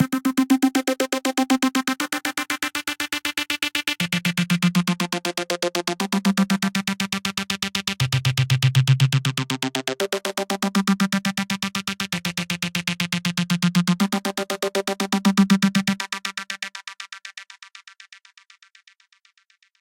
Happy Trance - Bass Chord Progression - 120bpm
Part of the Happy Trance pack ~ 120 Bpm
These is an original, rhythmatic, catchy bassline in 16ths, ready to be built looped & upon
bass, pluck, plucked, electronic, bassline, harmony, synthesized, arpeggiator, rhythm, 120bpm, music, EDM, 16th, melody, synth, progressive, catchy, 16ths, happy, original, fun, accompany, trance, progression, chord, synthwave, rhythmatic, pop, upbeat